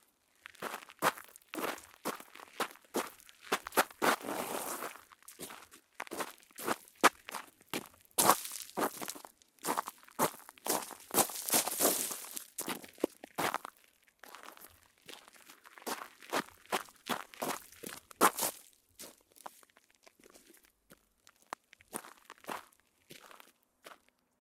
Footsteps - Exterior - various steps, stumble, stops, dirty, stony path
I had a walk outside during the day, I tried to denoise the mainstreet that is a couple of hundred meters away as goog as possible.
Recorded with Behringer B-2 Pro.